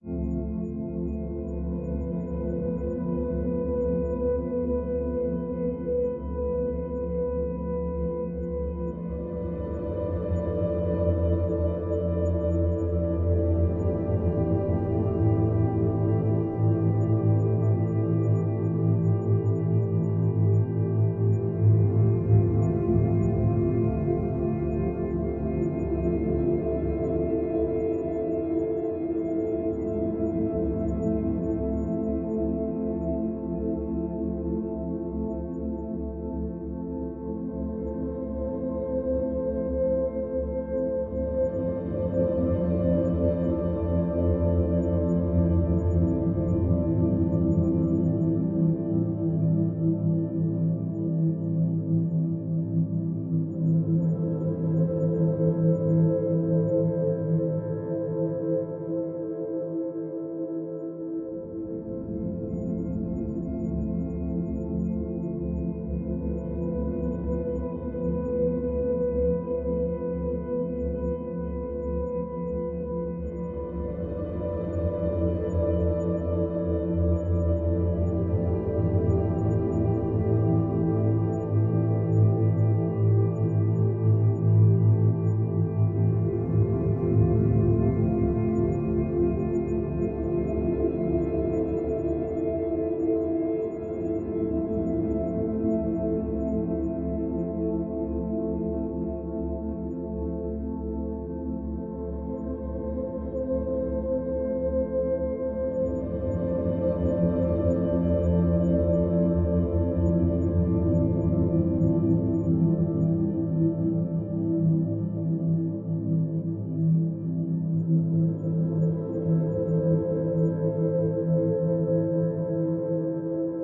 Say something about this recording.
Ambient Wave 30
This sound or sounds was created through the help of VST's, time shifting, parametric EQ, cutting, sampling, layering and many other methods of sound manipulation.
This sound was used here.
The Other Side
๐Ÿ…ต๐Ÿ† ๐Ÿ…ด๐Ÿ…ด๐Ÿ†‚๐Ÿ…พ๐Ÿ†„๐Ÿ…ฝ๐Ÿ…ณ.๐Ÿ…พ๐Ÿ† ๐Ÿ…ถ